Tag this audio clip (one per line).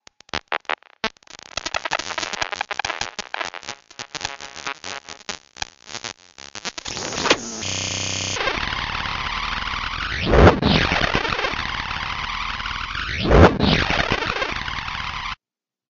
bending
bug